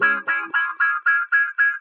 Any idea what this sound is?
DW G#M WAH
DuB HiM Jungle onedrop rasta Rasta reggae Reggae roots Roots
DuB, HiM, Jungle, onedrop, rasta, reggae, roots